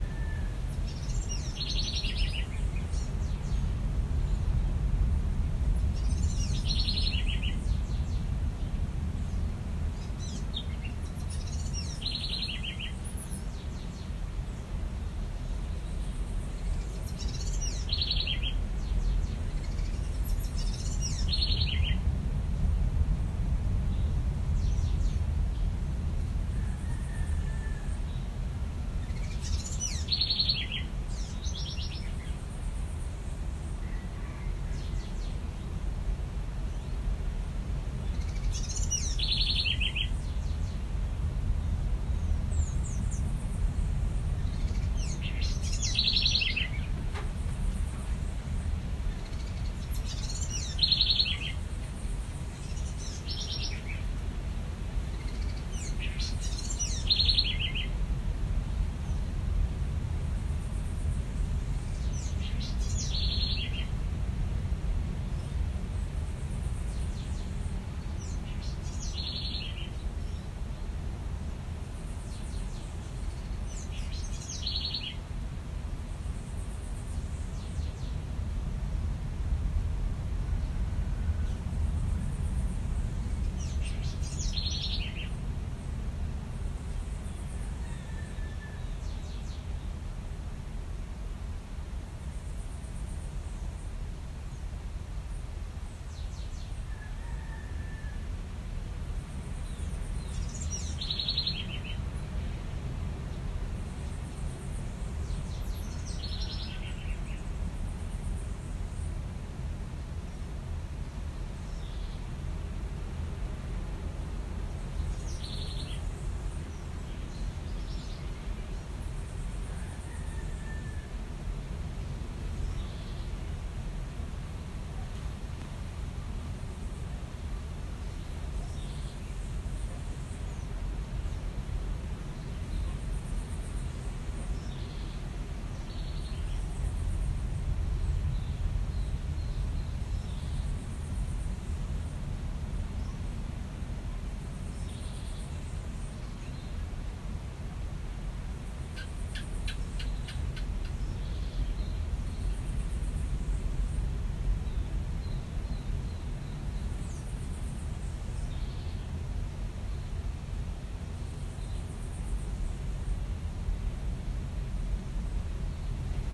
Birds Sunrise Portete Beach
Recording of birds singing at sunrise in Portete Beach - Ecuador - South America.
16 bits
ambiance, ambient, america, beach, birds, ecuador, fie, field-recording, nature, portete, south, sunrise